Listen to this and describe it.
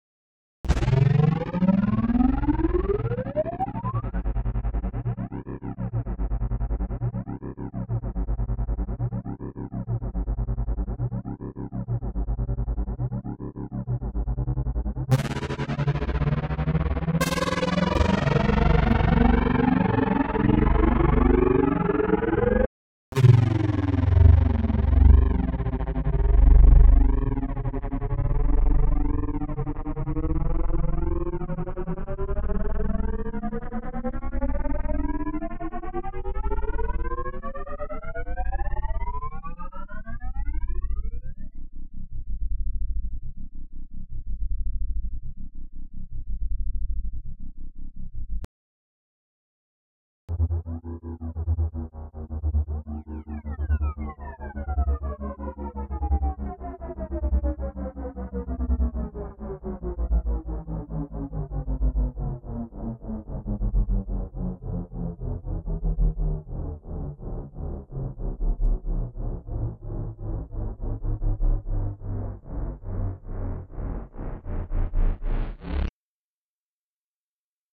Super processed sounds resembling space vehicles.
vehicle, space, podracer, crazy, sci-fi, electronic, machine